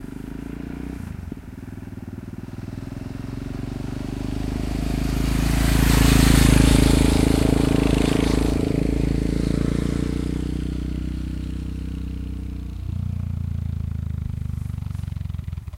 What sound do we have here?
Motorcycle passing by (Yamaha MT-03) 5
Recorded with Tascam DR-40 in X-Y stereo mode. Good, high quality recording. Slow approach and pass by. Low RPM, slow.
motorcycle, yamaha-mt03